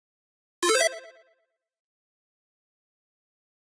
A success sound made by FLStudio with no reverb. Can be used for a computer sound when you do something right or ortherstuff like that.